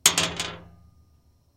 throwing pebbles onto metal04
Contact mic on a large metal storage box. Dropping handfuls of pebbles onto the box.
clack, clacking, contact-mic, gravel, impact, metal, metallic, pebble, pebbles, percussion, percussive, piezo, rocks, rubble, stone, stones, tap, tapping